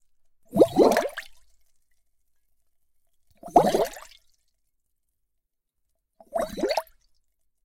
Bubbles Short Bassy Bursts
Short Bass Bubble Burst
Bubble, Short, Burst